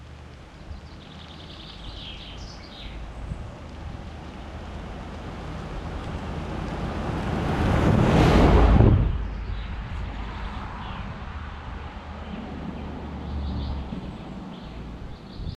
Car-sound car-drive-by car-engine engine car driving car-driving-part drive-past